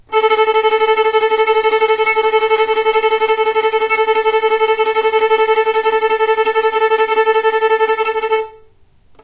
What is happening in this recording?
violin tremolo A3
tremolo, violin